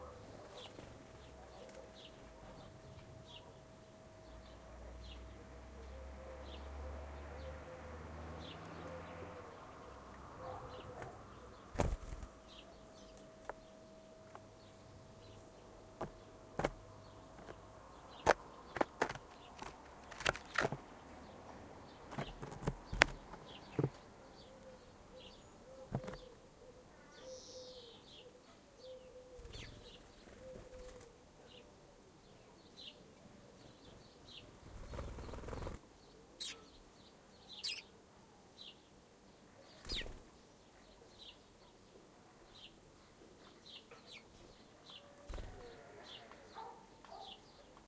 Some birds were attacking my dictaphone.
bird, birds, dictaphone